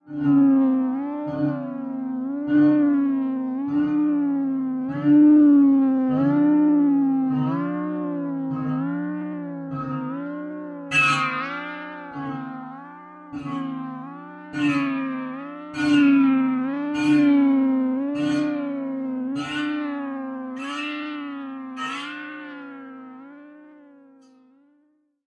Making weird sounds on a modular synthesizer.
analog, electronic, modular, noise, synth, synthesizer, synth-library, weird